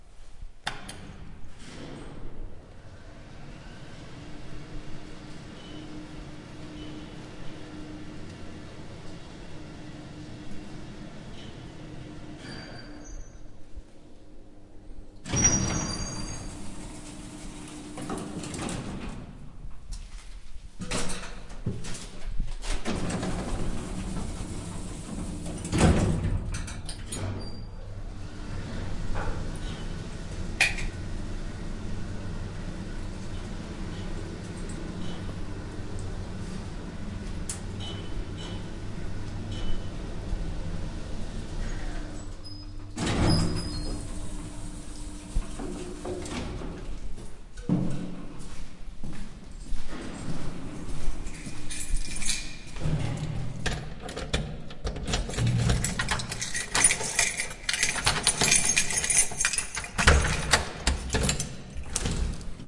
elevator trip
trin in modern elevator with quite noisy door, recorded with H4zoom
elevator, industrial, trip